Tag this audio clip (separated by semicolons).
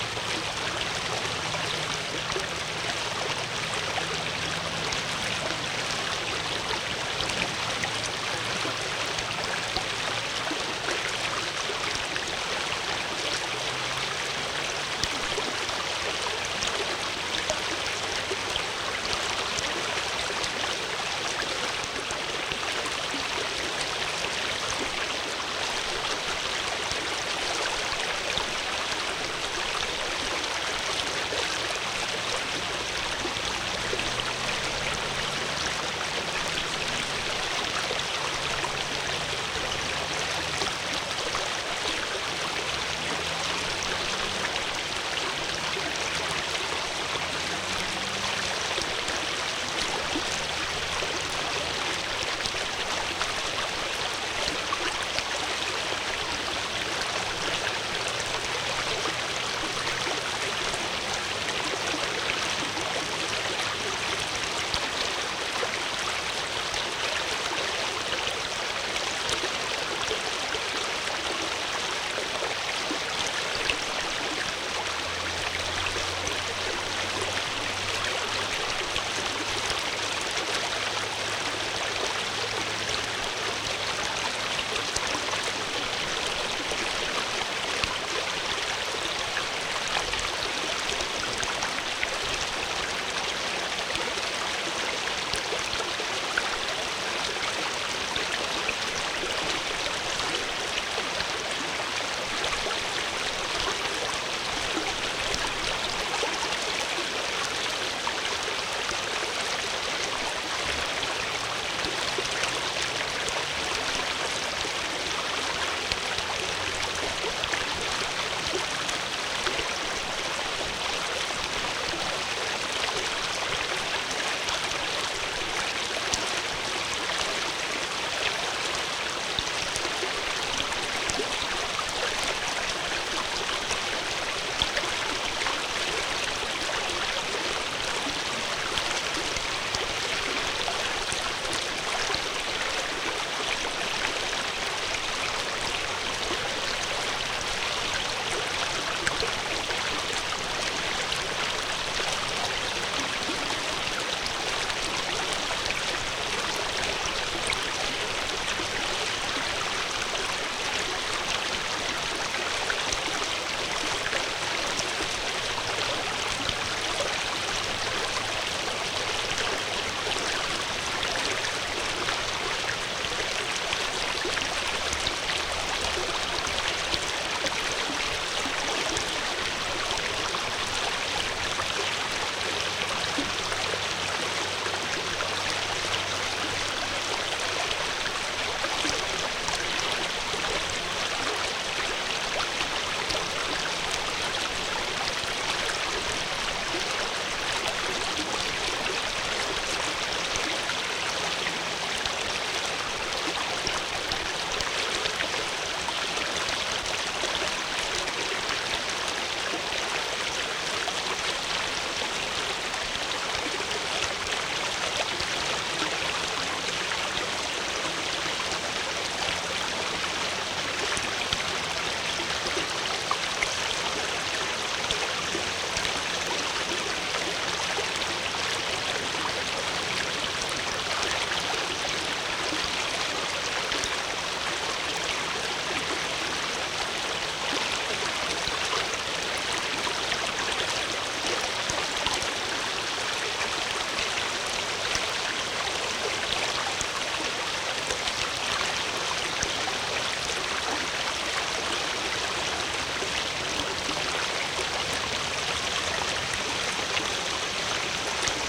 cars; flow; gurgle; river; shallow; stream; water